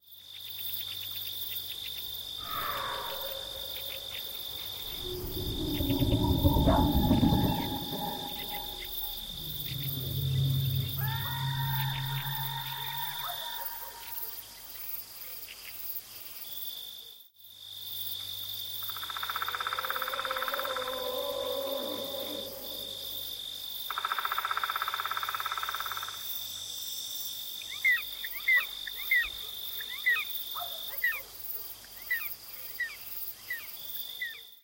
scary night ambience
ambience, night, scary